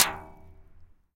Kenk hit aluminum
Recorded with a Sony PCM-D50.
Hitting a aluminum object.
hit, hitting, iron, metal, percussive, sound